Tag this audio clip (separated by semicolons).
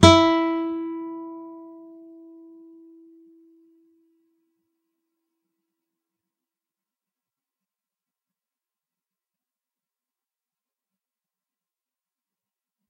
acoustic; guitar; nylon-guitar; single-notes